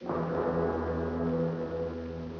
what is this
100 Lofi Defy Tonal Melody 03
Lofi Defy tonal melody 3
100BPM, Defy, Destruction, kit, Lofi, remix